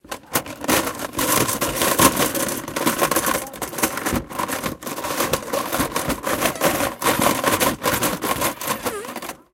SonicSnap HKBE 03
Belgium, Ghent